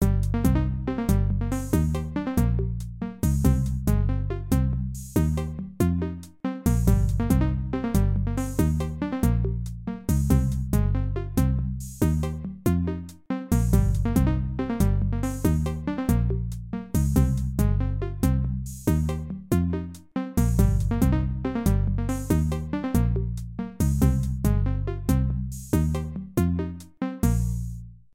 Main Theme
Main music theme for background in a video game
background-sound, Music, Theme